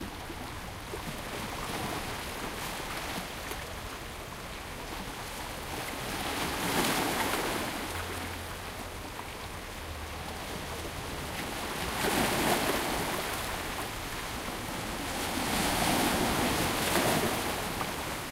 Harbor+music
beach, coast, Cyprus, field-recording, ocean, people, sea, seaside, shore, water, waves